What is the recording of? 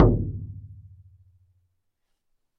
Shaman Hand Frame Drum 13
Shaman Hand Frame Drum
Studio Recording
Rode NT1000
AKG C1000s
Clock Audio C 009E-RF Boundary Microphone
Reaper DAW
bodhran, drum, drums, frame, hand, percs, percussion, percussive, shaman, shamanic, sticks